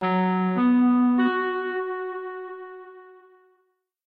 Sound played in Montreal Metro stations before a train leaves.